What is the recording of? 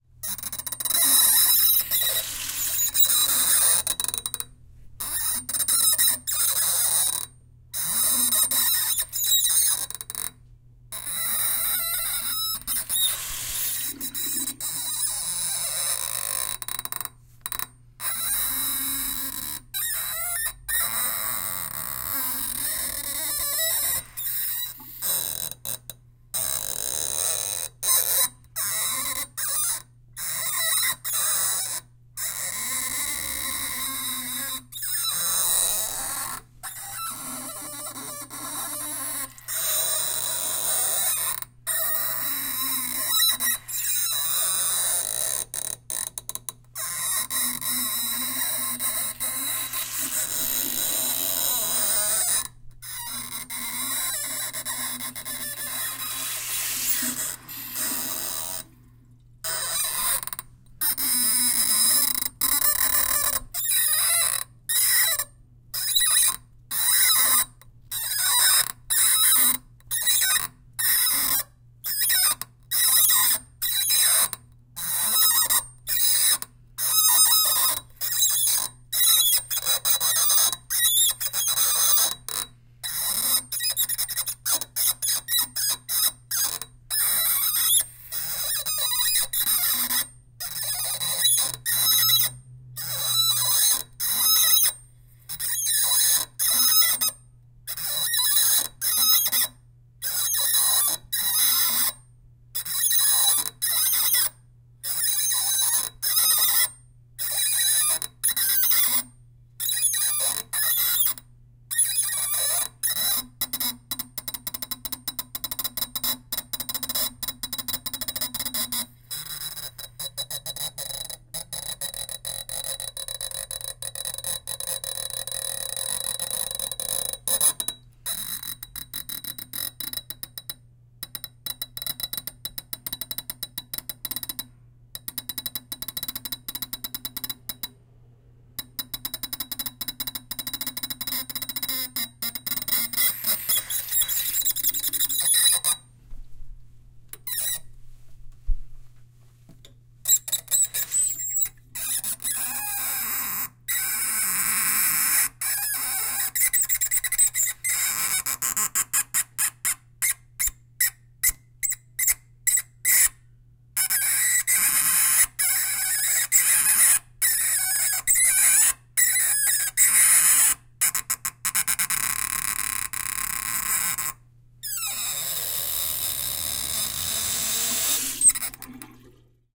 The spigot of a metal sink being twisted and rubbed with damp fingers. Possibly good for creature scream or whine sfx.